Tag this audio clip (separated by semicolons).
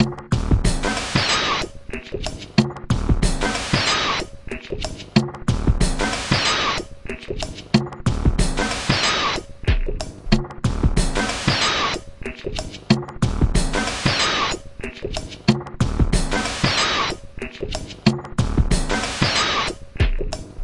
beat; harsh; industrial; loop; minimal; percussion; techno